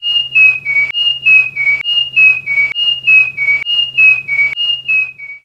This sound was created from the recording of a voice (by whistling) under audacity. The sound was cut to have only the part has to repeat. The effect "height" was increased and the effect "repeat" was applied five times.